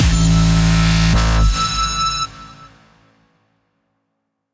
ufabuluss2end
A little hit I made as part of a larger project to imitate Squarepusher's Ufabulum.